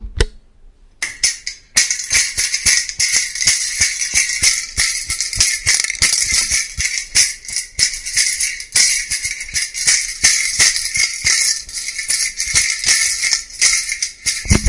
Sonicsnaps LBFR Serhat
france, labinquenais, rennes